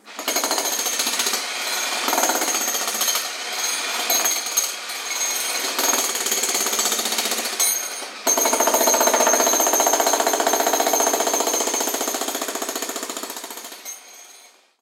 Jack Hammer3
a Jackhammer at work outside my home. pt. 3